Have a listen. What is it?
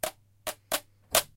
The sound of a Stylophone stylus being scraped across a plastic Stylophone speaker grill.
grill, noise, plastic, rough, scrape, scraping